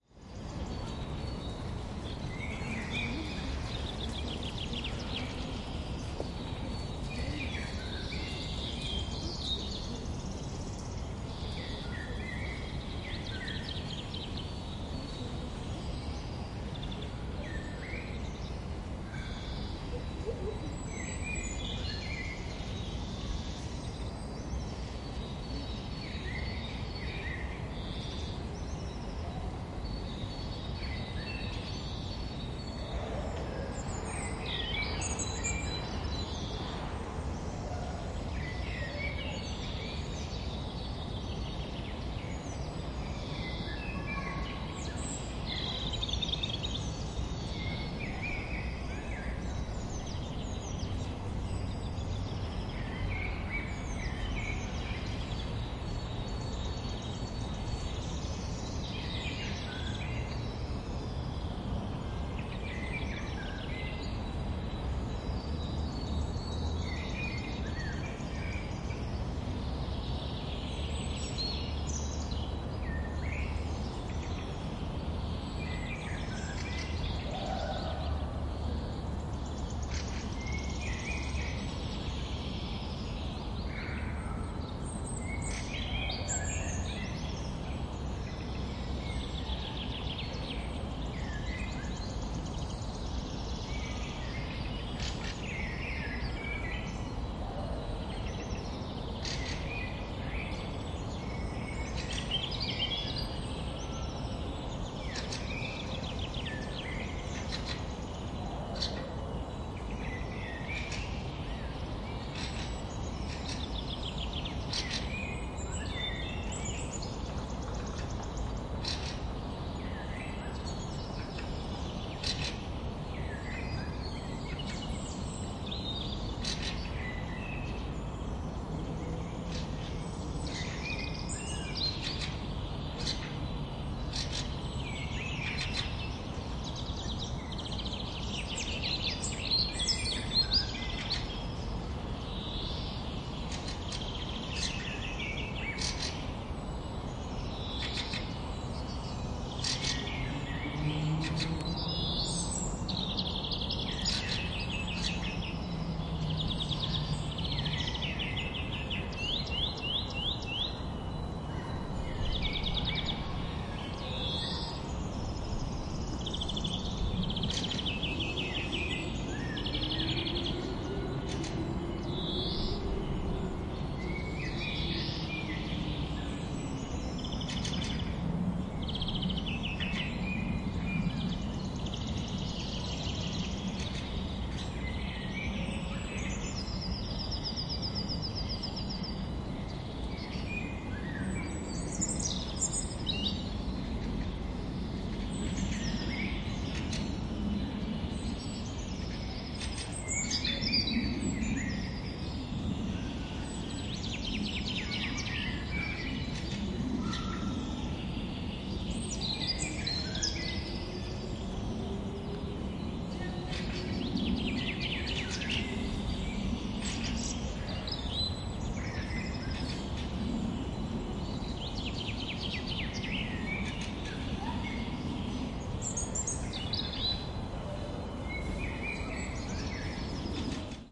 Tsaritsyno-Park. Spring. birds (XY-mic)
2015-04-28. birds and distant people in big Moscow park Tsaritsyno.
ambience, city, field-recording, Moscow, park, people